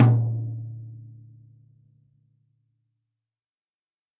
Rogers1968FulltertonEraHolidayRockKitTomMid13x9
Toms and kicks recorded in stereo from a variety of kits.